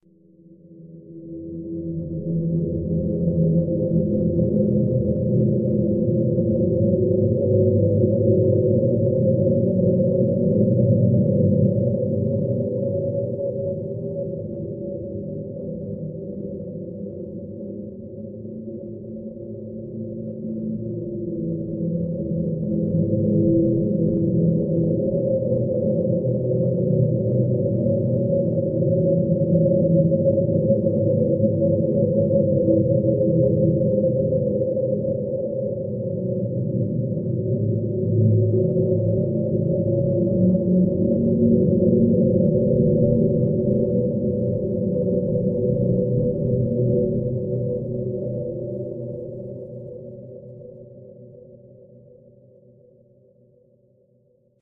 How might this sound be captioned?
haunting ambient lunar soundscape
soundscape, ambient, lunar, haunting